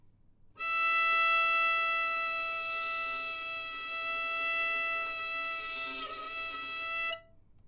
overall quality of single note - violin - E5
Part of the Good-sounds dataset of monophonic instrumental sounds.
instrument::violin
note::E
octave::5
midi note::64
good-sounds-id::2457
Intentionally played as an example of bad-timbre-errors
neumann-U87, good-sounds, multisample, violin, single-note, E5